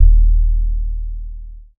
Sub Mania 02
SUB BASS SUBBASS